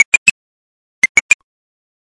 sonokids-omni 13
abstract, analog, analogue, beep, bleep, blip, cartoon, click, comedy, computer, electro, electronic, filter, fun, funny, fx, game, happy-new-ears, lol, percussive, ridicule, sonokids-omni, sound-effect, soundesign, switch, synth, synthesizer, toy